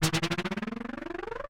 Short sound effects made with Minikorg 700s + Kenton MIDI to CV converter.
FX, Korg, Minikorg-700s